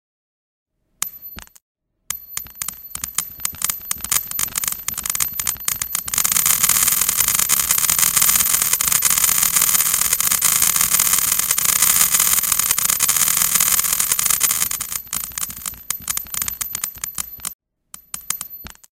I took a single sample of a needle dropping and multiplied it many times to create the effect of a bucket of pins and needles being dumped out on a concrete floor.
needle, pin, drops, drop, pins, needles, multiple